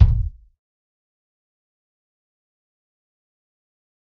Dirty Tony's Kick Drum Mx 075
This is the Dirty Tony's Kick Drum. He recorded it at Johnny's studio, the only studio with a hole in the wall!
It has been recorded with four mics, and this is the mix of all!
realistic, raw, punk, tonys, drum, dirty, kit, kick, pack, tony